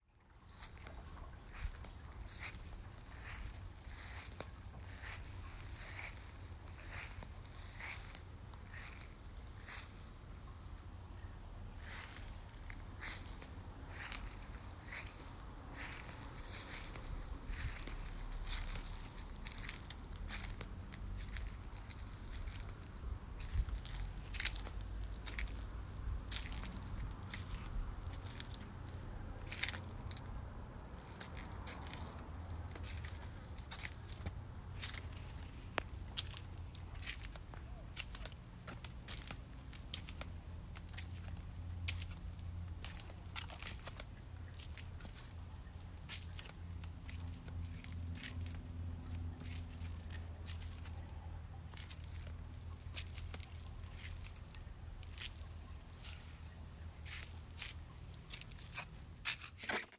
A soundwalk in a suburban backyard in Malibu, California right off of the Pacific Coast Highway, on a Summer afternoon.